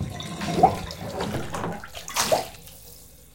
My failed attempt at plunging a bath tub...
plumbing, plunger, water